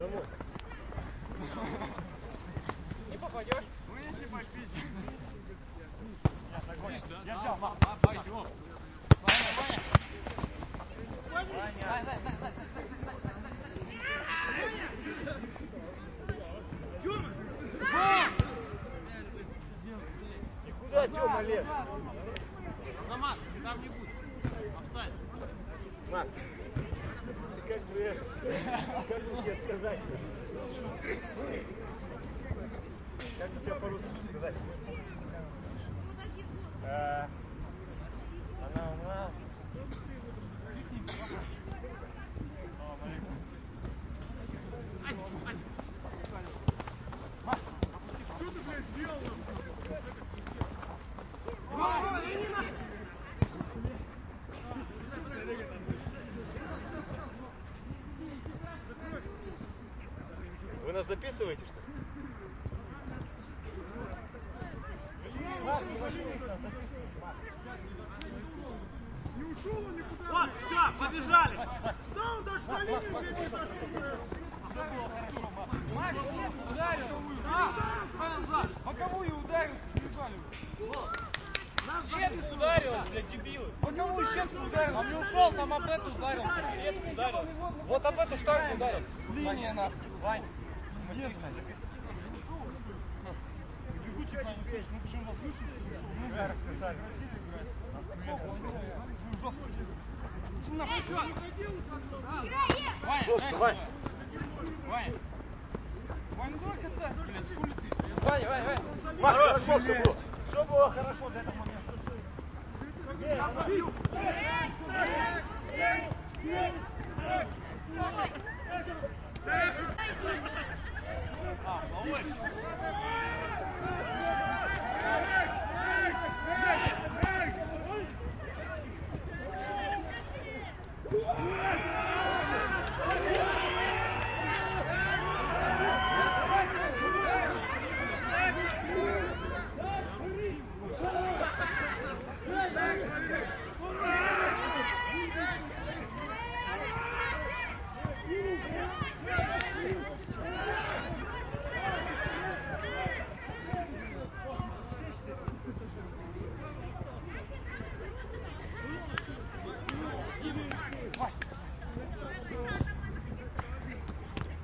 soccer men play soccer
Men play soccer. Hear russian speech and obscene vocabulary. Hits the ball. Hit the ball to the fence. Small university football pitch. Fans screams. City noise around.
Recorded 2012-09-29 16:30 pm.
ball, men, Omsk, Russia, russian-speech